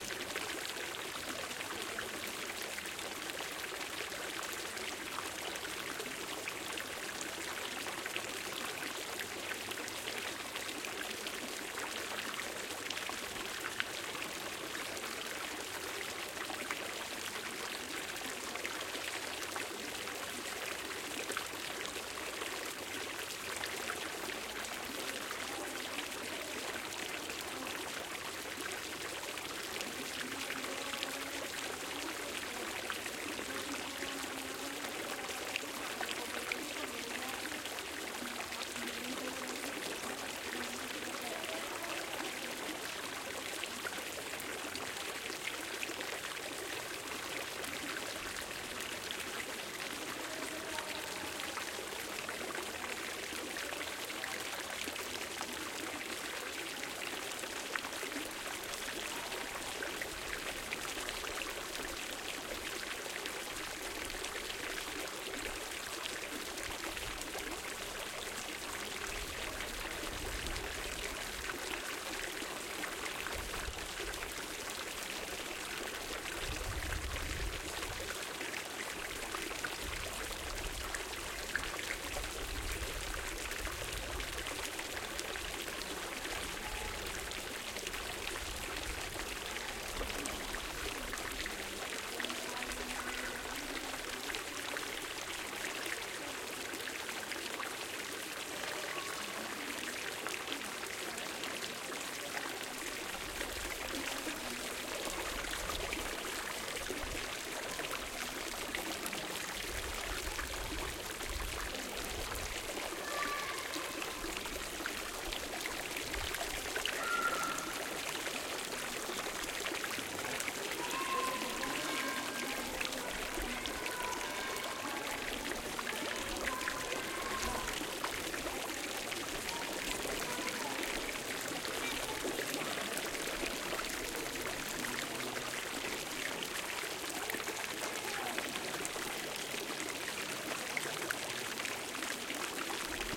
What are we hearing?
Fountain of water in the sqare of the cathedral of Huesca (Spain).
I used the digital recorder Zoom H6.